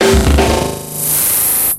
Two snare hits rapidly degenerating into high-pitch delay feedback.Taken from a live processing of a drum solo using the Boss DM-300 analog Delay Machine.